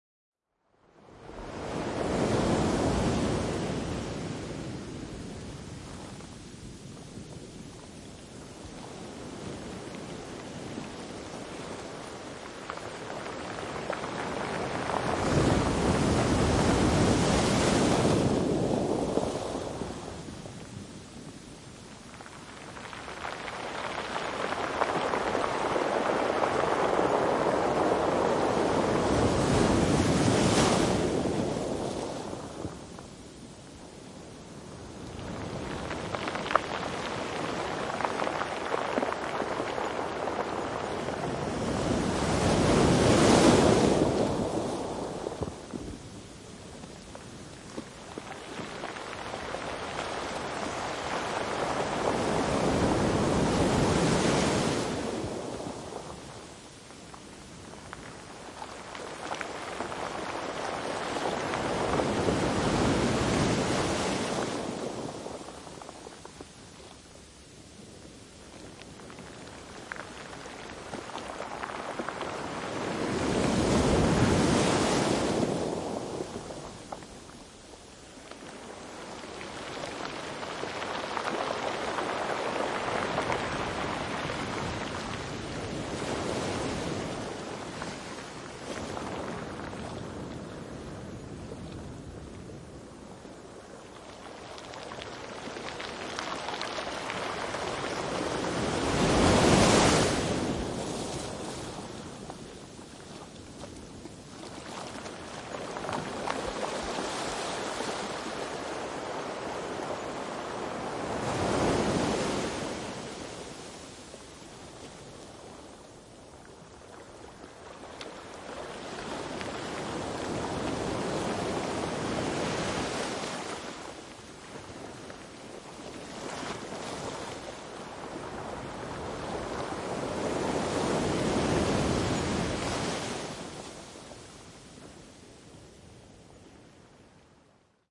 ile de Ré, edge of beach, bag and surf, stones roll under water.
The sea is relatively powerful, I am in the water, the boom above the waves.